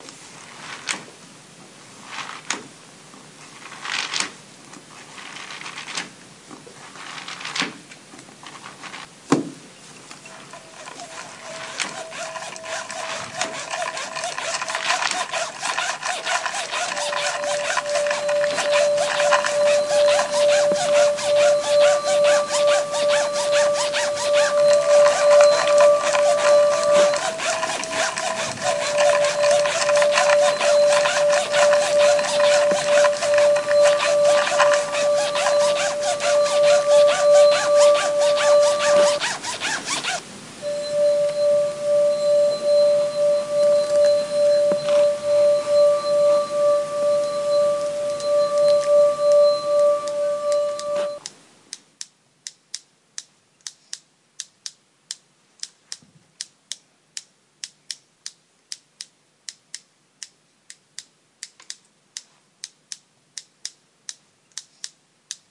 Soundscape Regenboog Myriam Bader Chaimae Safa
Belgium students from De Regenboog school in Sint-Jans-Molenbeek, Brussels used MySounds from Swiss students at the GEMS World Academy in Etoy, to create this composition.
Belgium, Brussels, Jans, Molenbeek, Regenboog, Sint, Soundscape